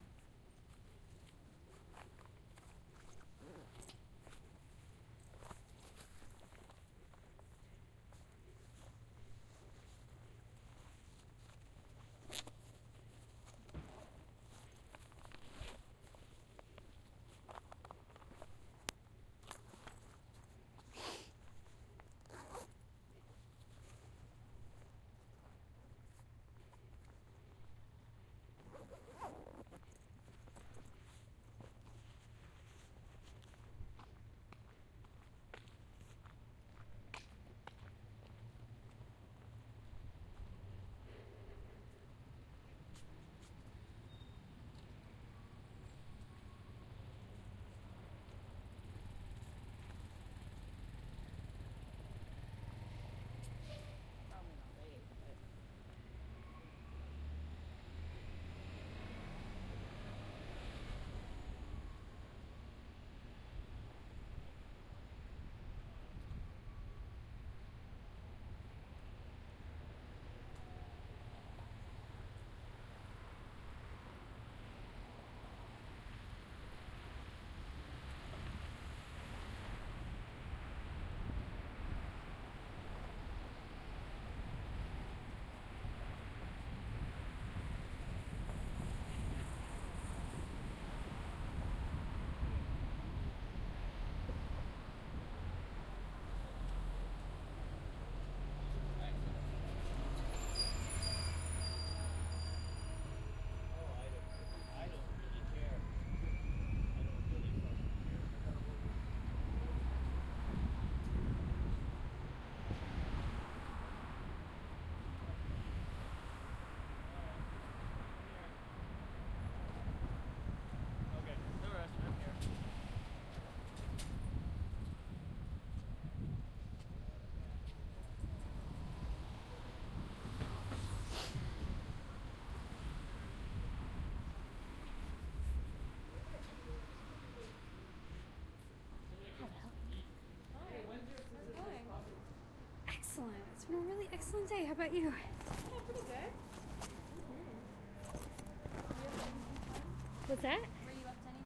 windy schoolkids
Walking by a high school as it lets out for lunch. A bit of wind in the background.